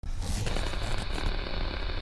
TV Noise
tv, white, noise